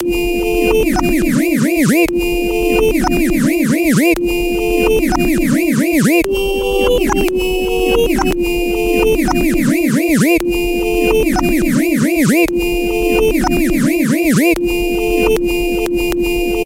too much
A Neon Party Sound recorded at 155.400bpm.
syth, processed